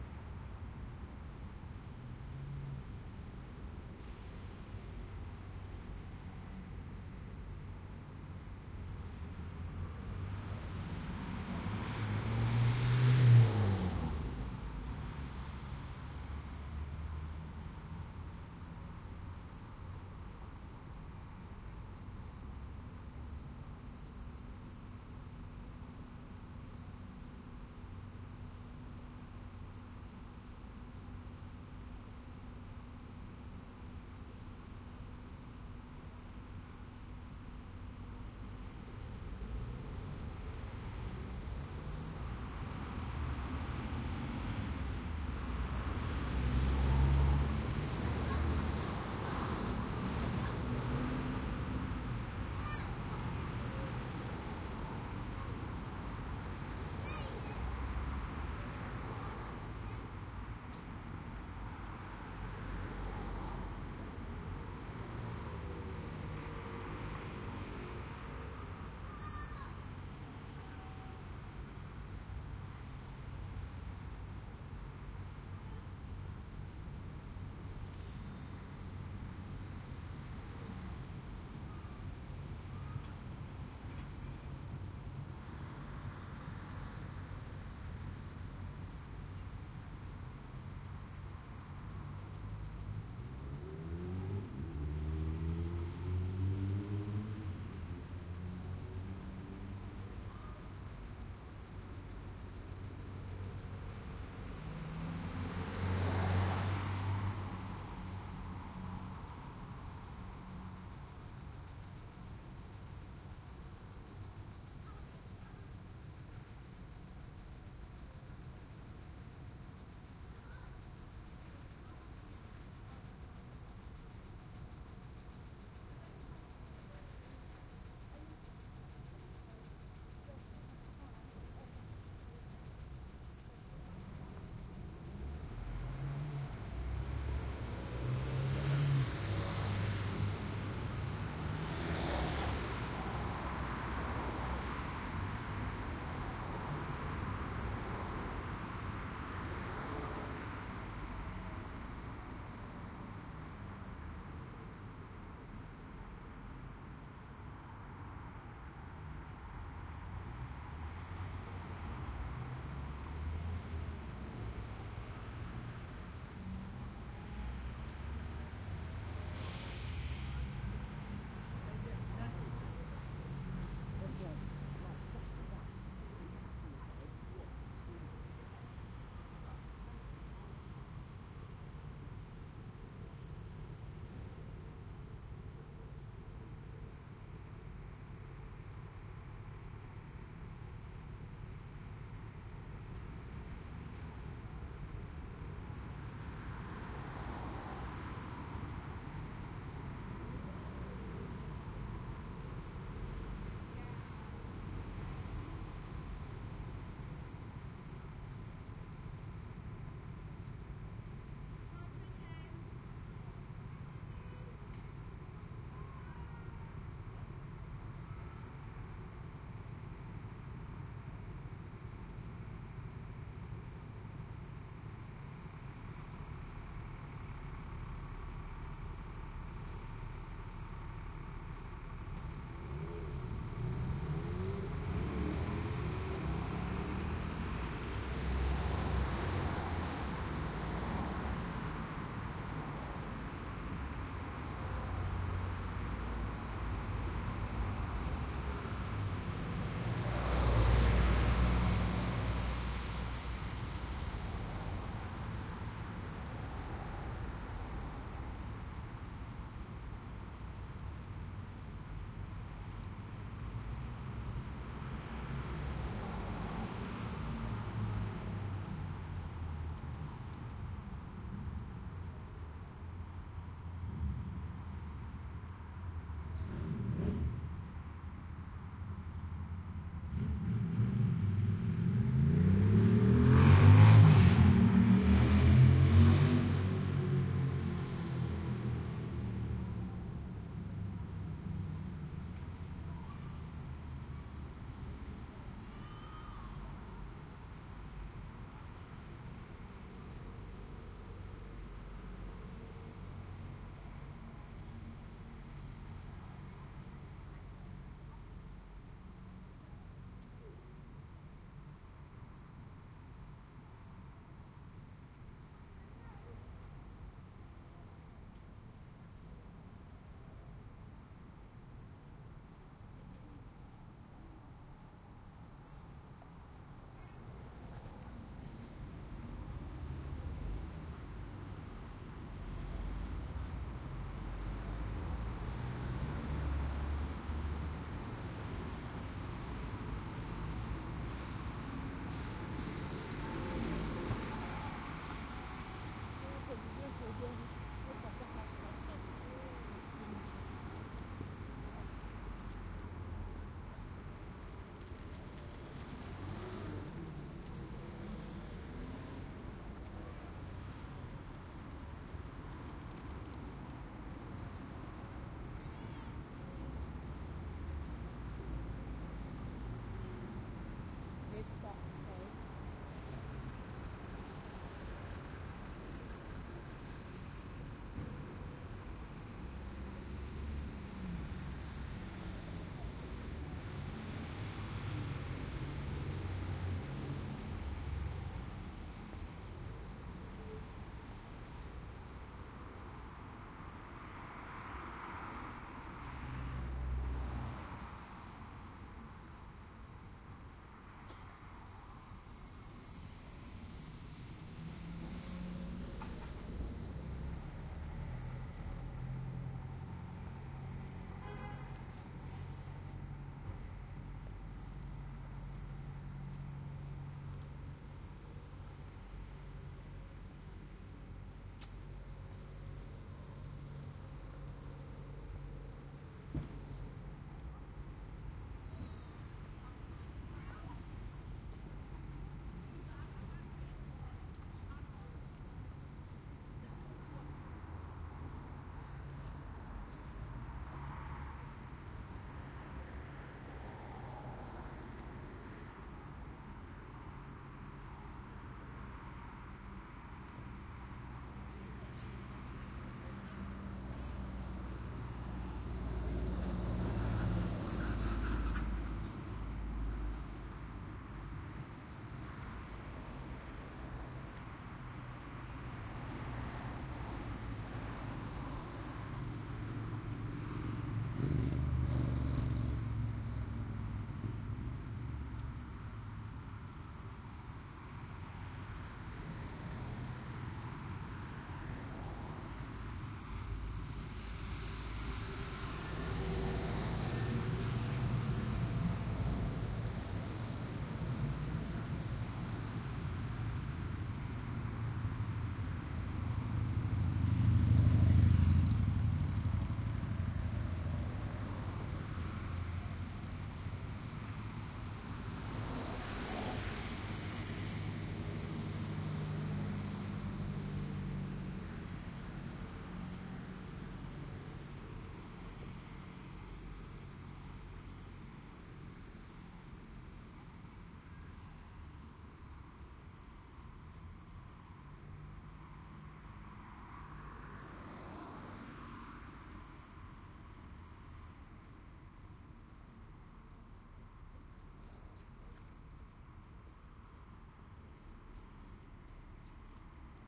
Ambience - City - Evening - Traffic
Ambience cityscape - London - Essex Road. Outside the train station. 4:30
ambiance, ambience, ambient, atmos, atmosphere, background, background-sound, city, cityscape, field-recording, London, omnidirectional, Pavment, sidewalk, sound-scape, soundscape, stereo